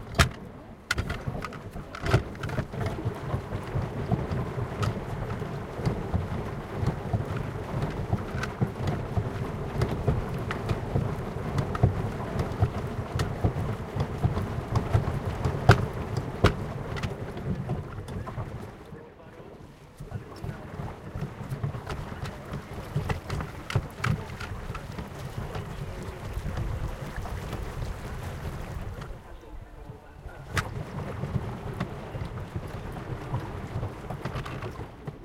Recorded at swimming pool in Banska Bystrica (Slovakia). I am sitting in the water bicycle (or better water wheel) with my girlfriend and we are pedaling together. You can hear sounds of water and some pool announcements. Recorded with Zoom H1.